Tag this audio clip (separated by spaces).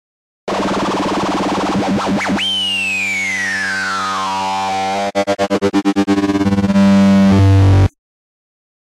sub Electronic low